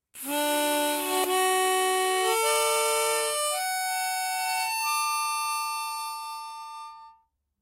Harmonica recorded in mono with my AKG C214 on my stair case for that oakey timbre.
d, harmonica, key